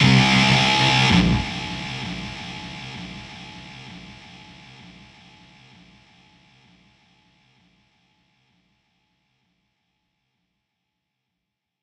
Guitar intune 2
Drum, Creative, Snare, Stab, Acoustic, Kick, Microphone, Sample, EDM, Loop, Electric, Bass, Drums, 4x4-Records, Instrument, test, Off-Shot-Records, Guitar, Music